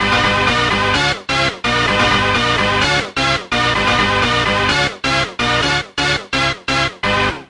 128-old-school-rave-loop
1 rave-stab loop, old-school 1991 type :)
ravestab, rave, old-school, oldschool, ravestabs, techno